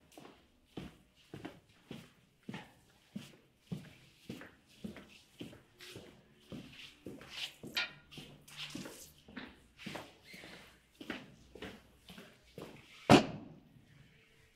Soldier steps
steps of a soldier, recorded in a hallway with senheisser 410 and zoom H6
Boots, footstep, indoor